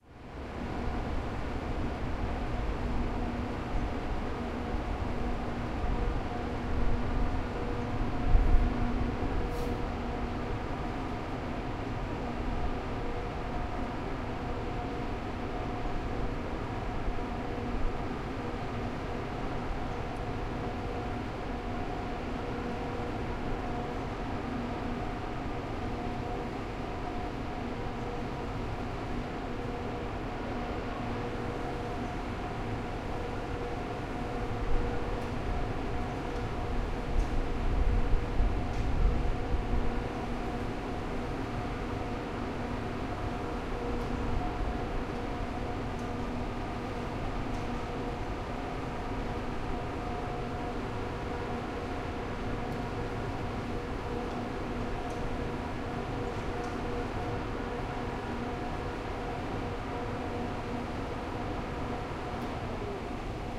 birmingham-aston-canal-extractor-fan
UK Birmingham canal between ICC and Aston, large factory with very powerful extractor fan exhaust from roof running in foreground. Air flow noise superimposed on fan motor drone with some phasing.
H2 Zoom front mic with wind shield, hand held, some wind noise, worse towards end, missed the foreground fan switch off.